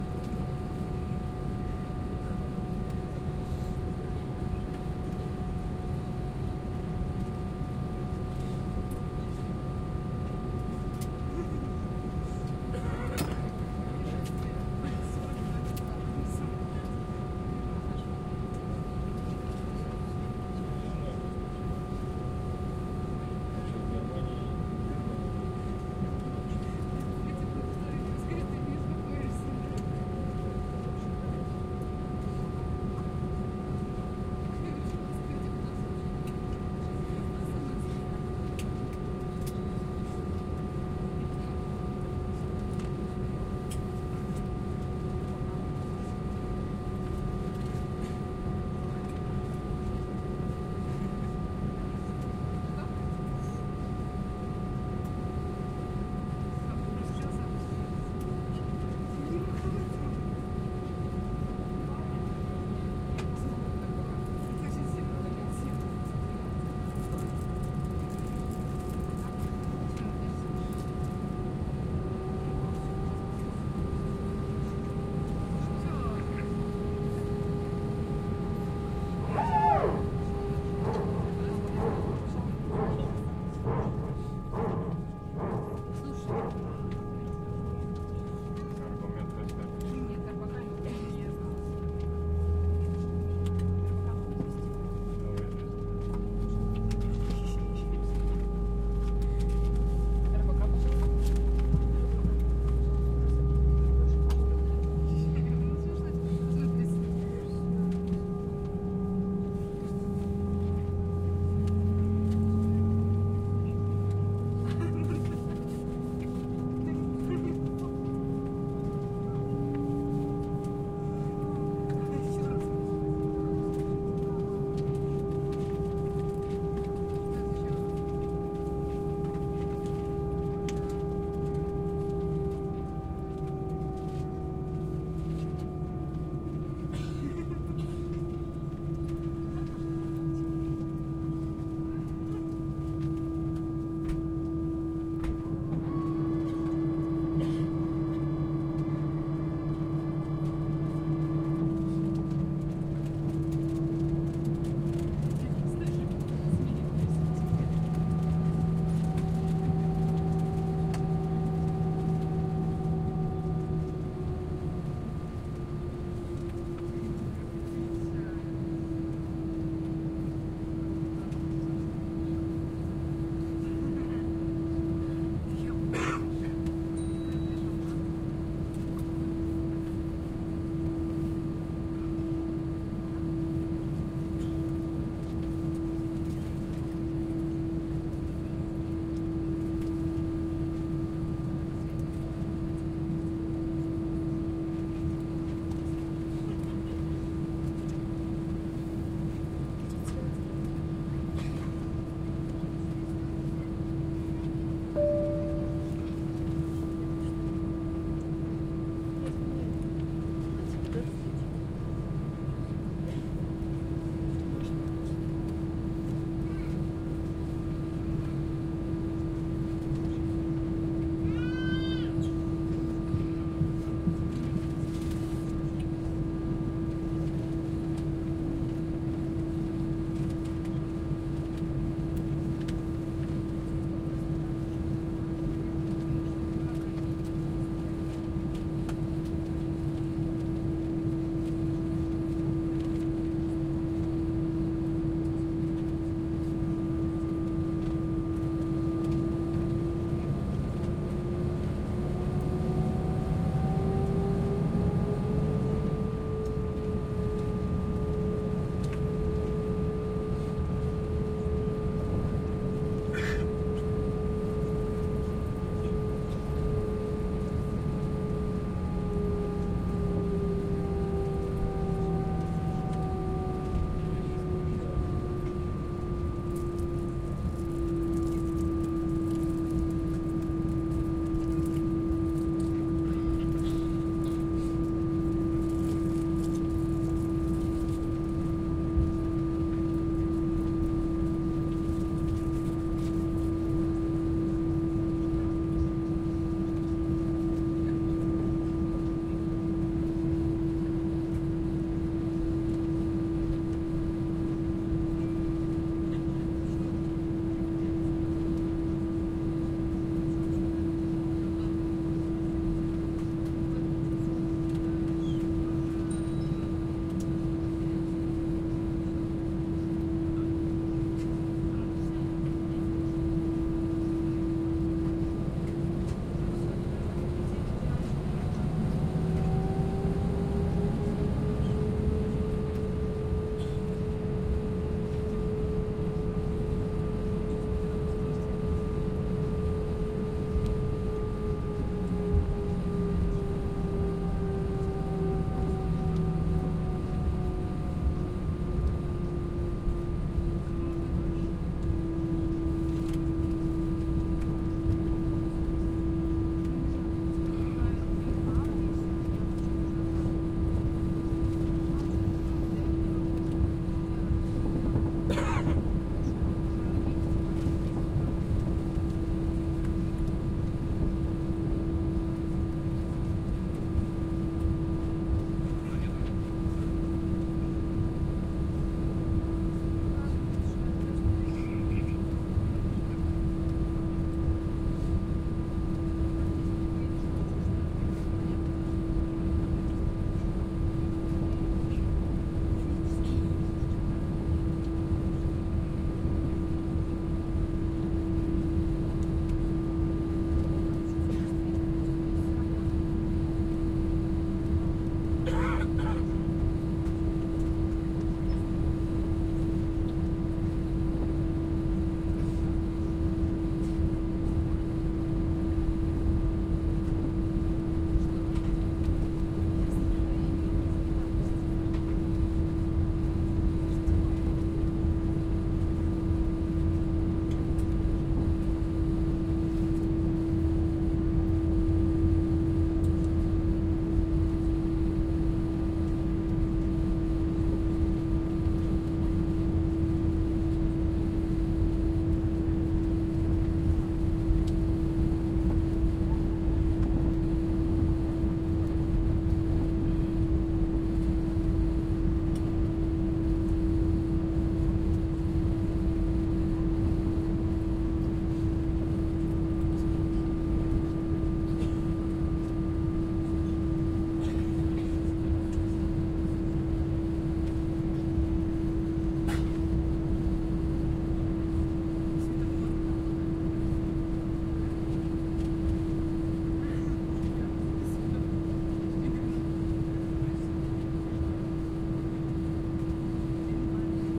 Atmosphere in the plane. Flying.
Date: 2016.03.07
Recorder: Tascam DR-40